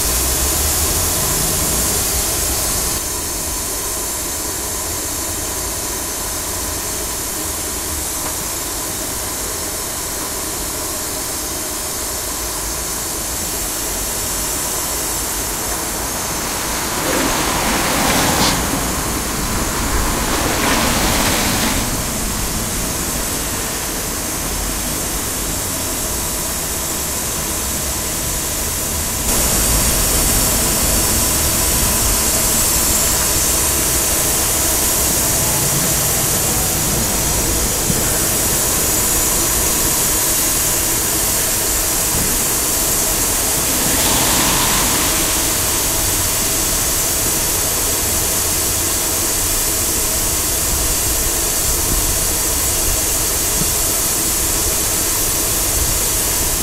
Liquid Nitrogen
I was walking down a street and there was a man at the back of a van, doing what appears to be the refilling of nitrogen tanks. No idea which of the stores in the street it was for.
Recorded with Zoom H2. Edited with Audacity.
noisy, psh, pshh, flow, nitrogen, cooling, pressure, cold, noise, gas, chemical, poison, shhh, liquid, pssh, air, chemistry, coolant